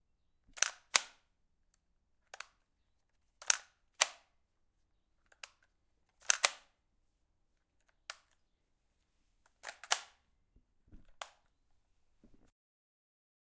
Airsoft Gun cock
Airsoft Rifle being Cocked: Spring Action, Spring Pulled back. Recorded with Recorded with Zoom H4n recorder on an afternoon indoors in Centurion South Africa, and was recorded as part of a Sound Design project for College. A gas powered Airsoft rifle was used
Airsoft, Airsoft-Rifle, cocking, Gun, Mag, Magazine, owi, Rattle, Rifle, sfx, Weapon